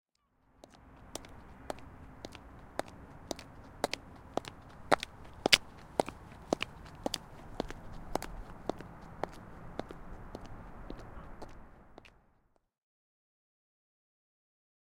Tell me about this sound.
20 hn footstepsConcreteHH
High Heeled shoe footsteps on concrete walkway.
footsteps, concrete, high-heeled-shoe